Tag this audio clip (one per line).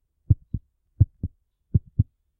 beat
beating
blood
excited
frightened
heart
heart-beat
heartbeat
pulse
pump
rhythm
rhythmic
scare
scared
thriller
throb